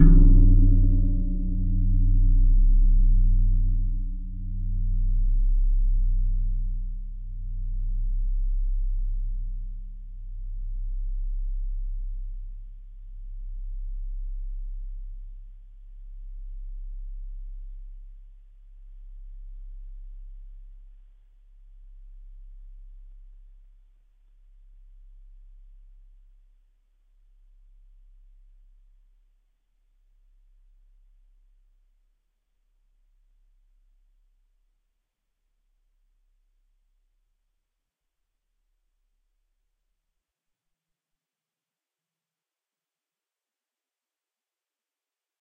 Gong - percussion 14 03
Gong from a collection of various sized gongs
Studio Recording
Rode NT1000
AKG C1000s
Clock Audio C 009E-RF Boundary Microphone
Reaper DAW
clang, drum, gong, iron, chinese, ting, percussive, metal, percussion, steel, metallic, bell, hit